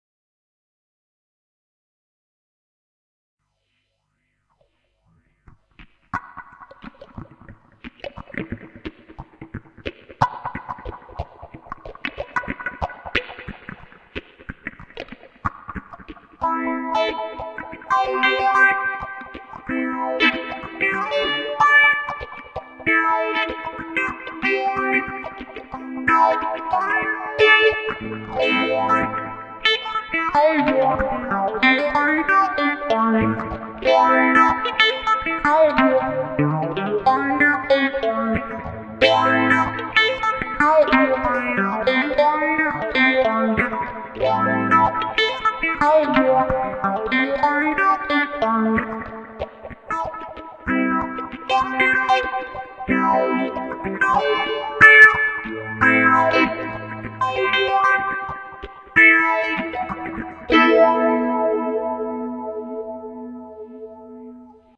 This is short, quiuck fank minor composition. Key in Am.
Made record through "presonus inspire 1394".
chord, chords, clean, delay, electric, fank, guitar, Minor, music, phase, power-chord, Quick, reverb, reverberation, solo, song, string